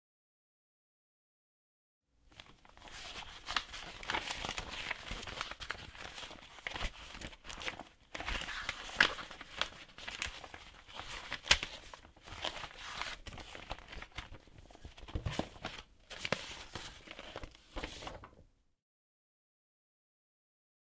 Browsing through paper, then taking one out of the file